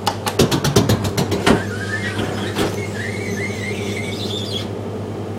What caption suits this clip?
vending machine button
pressing a button to operate a vending machine. What I had to do was keep pressing this button until I could get what I wanted.